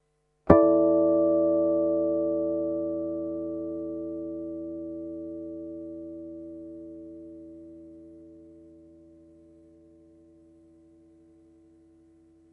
Finger plugged.
Gear used:
Washburn WR-150 Scalloped EMG-89 Bridge
wr150, tascam, emg-s, scalloped, dr-05, guitar, electric, emg-89, harmonics, washburn